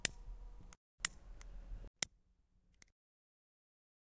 Lighter Click
A Lighter Klicking, Recorded on a Zoom H2 Mildly Compressed and EQed.